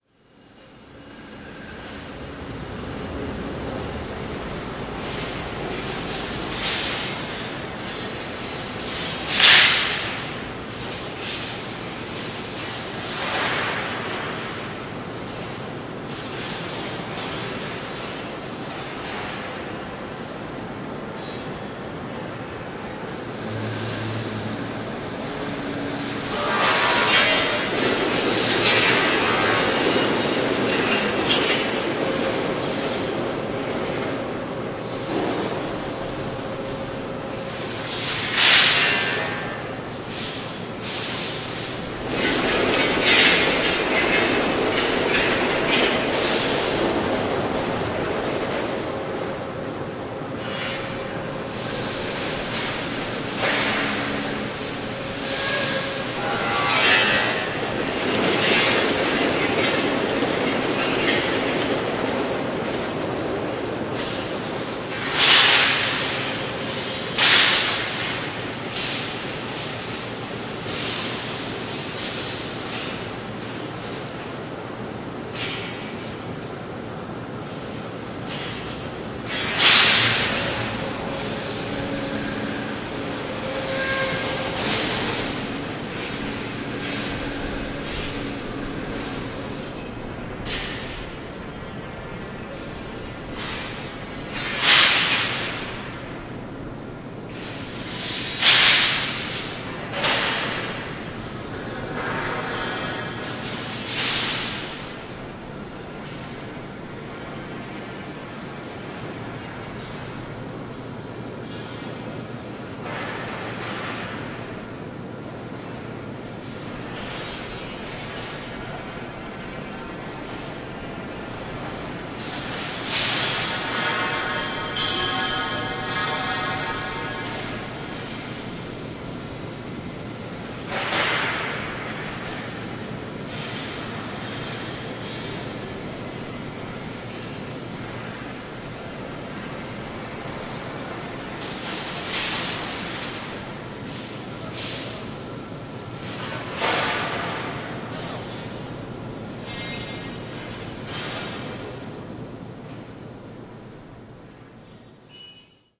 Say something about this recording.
field recording of a steel factory in a Paris suburb. heavy machinery, distant voices, blasts, impacts, recorded with a zoom h2n
factory, heavy, impacts, industrial, industrie, lourde, machinery, metallurgie, metallurgy, Pantin, plant, steel-industry, usine